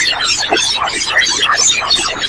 artistic noise
I recorded mostly nothing, then normalized it, so it was mostly loud static. I used audacity's noise remover to make the sound and it's wahwah effect to make it move across stereo space. Very interesting, good for static and alien voices.
alien, artistic, high, noise, static, stereo